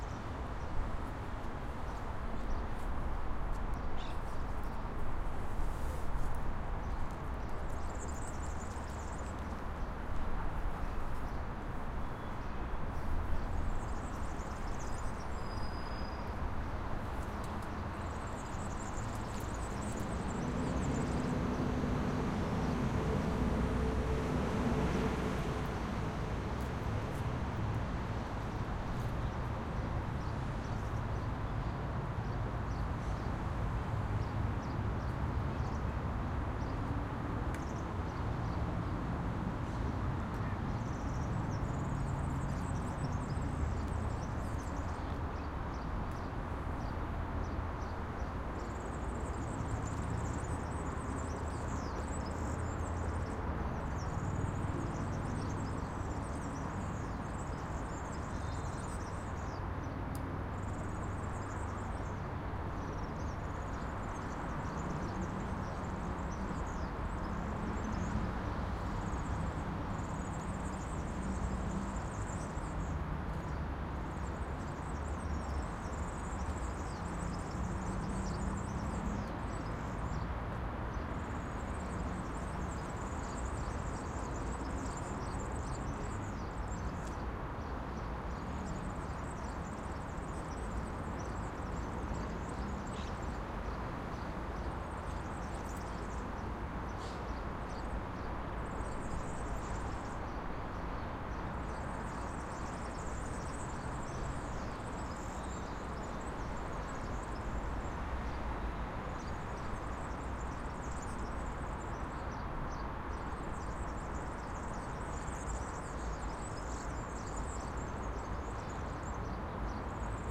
Calidoscopi19 Via Barcino 1
Urban Ambience Recorded at Via Barcino in April 2019 using a Zoom H-6 for Calidoscopi 2019.
SoundMap, Calidoscopi19, Nature, Simple, Pleasant, Traffic, Quiet, TrinitatVella, Monotonous